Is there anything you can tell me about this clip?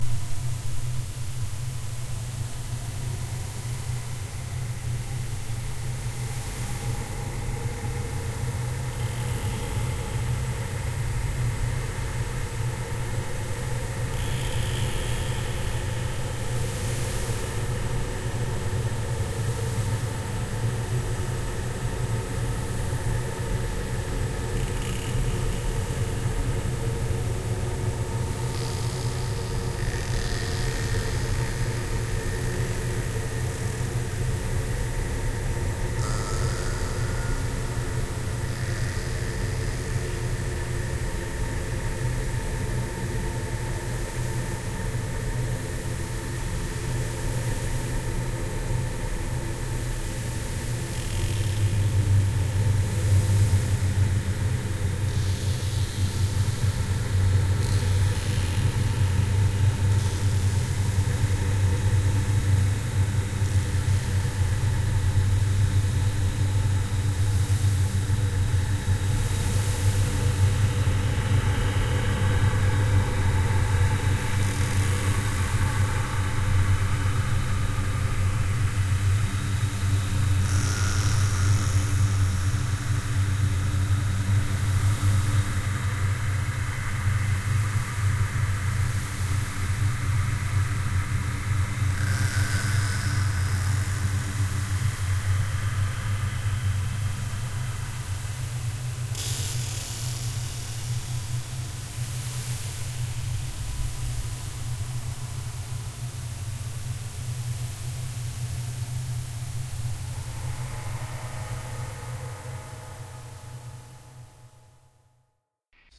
Shadow Maker - Cellar
What you hear is the sound of an old mystic Engine, or something else, i don´t know. it haunts this old house for almost ten years. Will you discover the truth that lies beyong the darkness of the houses cellar? I made it with Audacity. Use it if you want and you don´t have to ask me to. But i would be nice to tell me, when you used it in one of your projects.
Ambiance, Ambience, Ambient, Atmosphere, Cellar, Cinematic, Creature, Creepy, Dark, Drone, Engine, Entrance, Evil, Fantasy, Film, Free, Ghost, Hall, Halloween, Horror, Light, Maker, Movie, Nightmare, Passing, Public, Scary, Shadow, Sound, Spooky